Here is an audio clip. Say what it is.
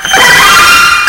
Chord SMASH
You are bidding on! No, these are my PSS 270 synth Cutter,
I finally busted that bitch out of storage again, it is processed through a Korg KAOSS Pad with the Reverb
Effect. So it is semi Noisy, Enjoy.! Good for some back drops, If you
mess with the glitches you can hit a key to sync the key to a drumloop,
and the drumloop becomes the keyloop, ryhthmic Isnt it.....I love everyone. ENJOY!